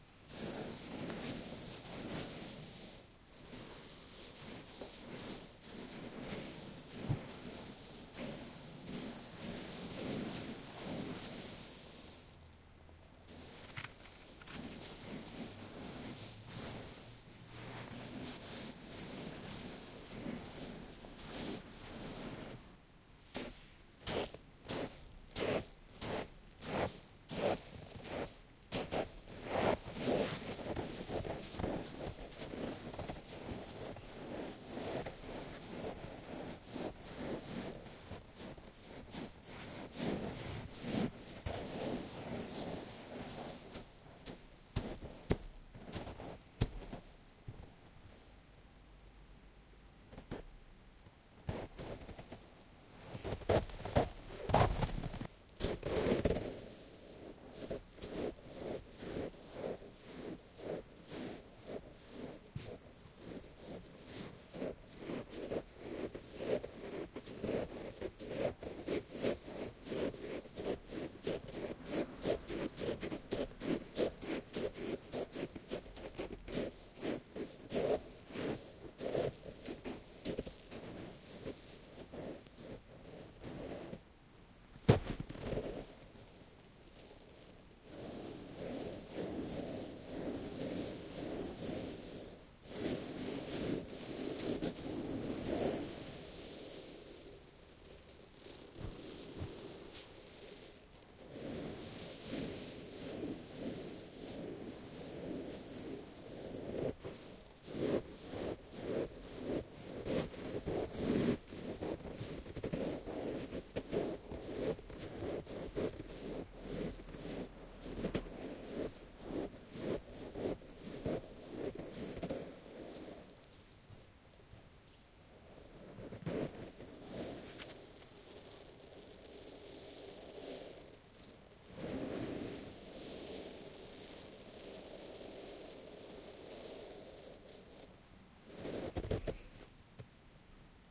Footsteps in Sand
Using rice to imitate walking in Sand. Used my hands to do this, though.
adpp, footfall, footsteps, rice, sand, walking